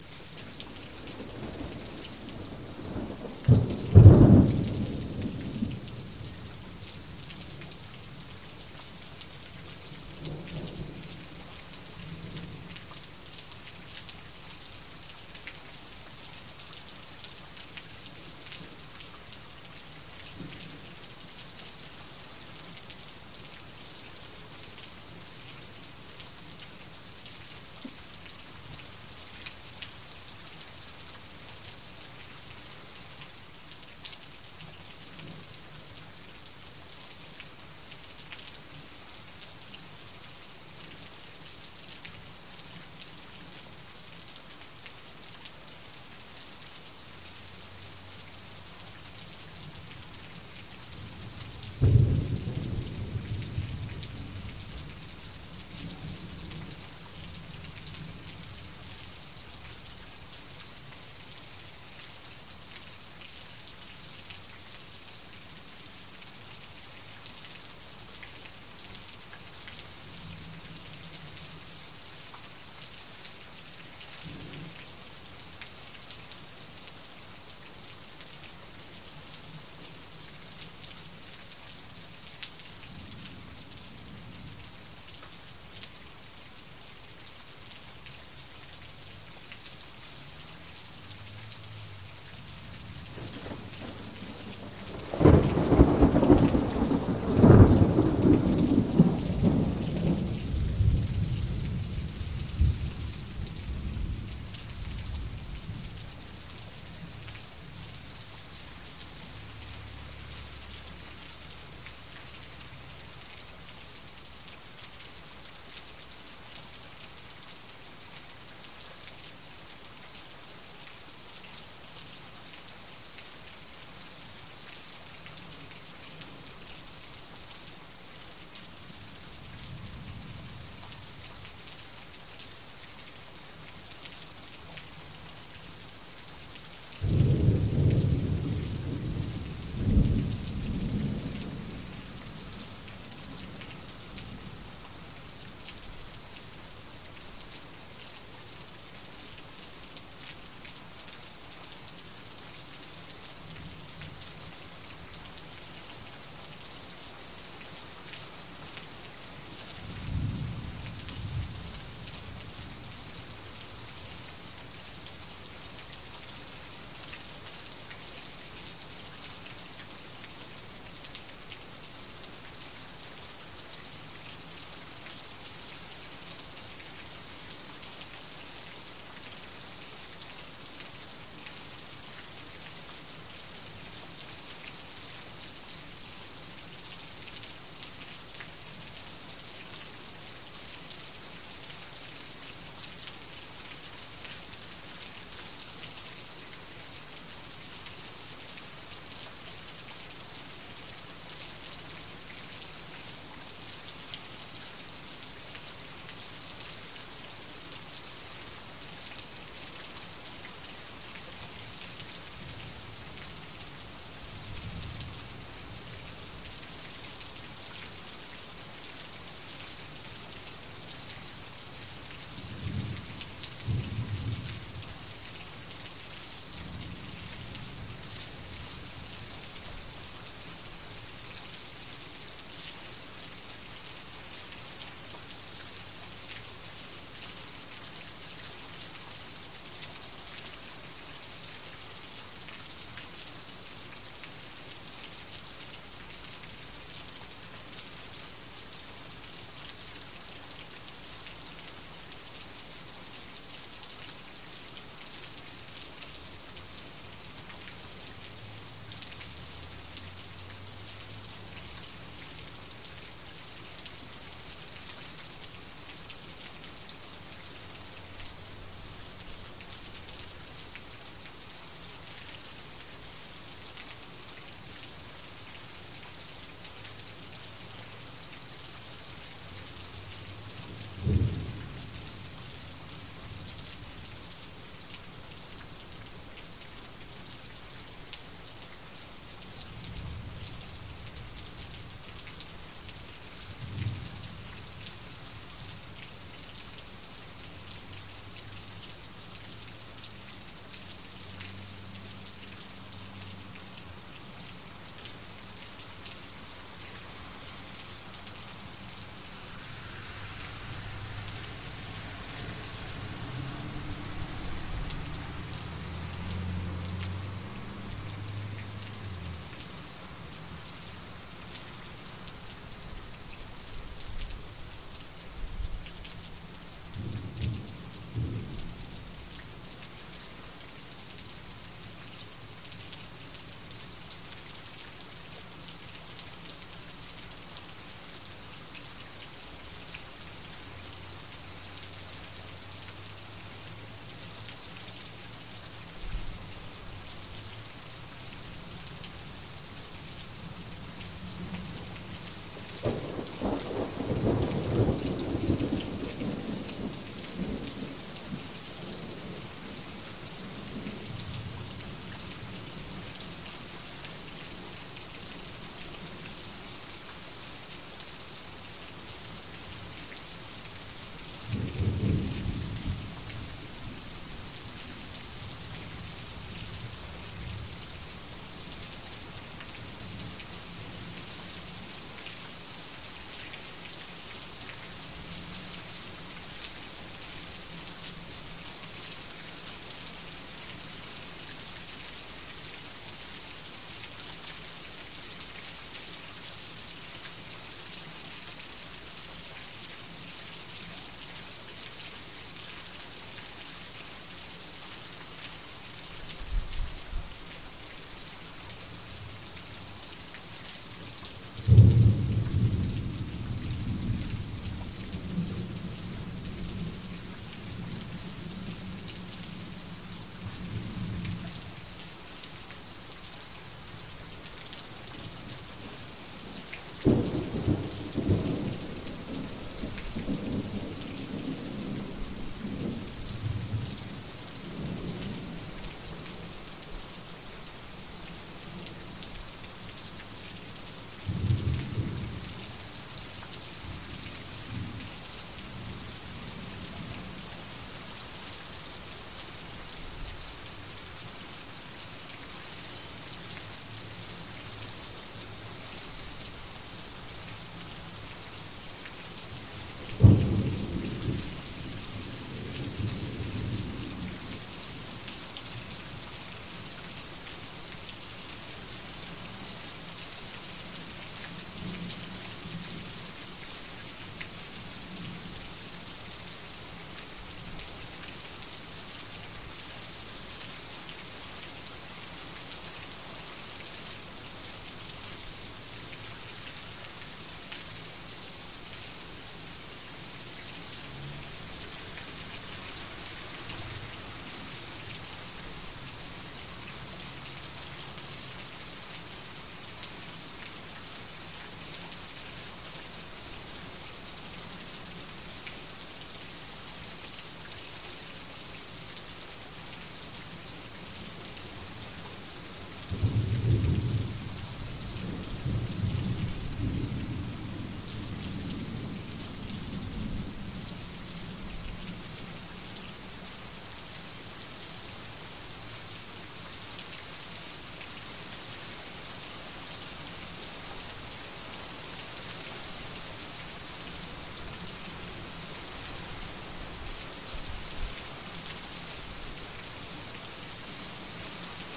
goog rain and thunder
thunder and rain u can use in whatever u like
rain, rain-storm, storm, thunder, thunder-storm